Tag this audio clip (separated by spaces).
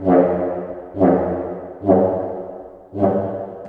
folk naples putip caccavella ethnic bamboo rubbing percussion membrane clay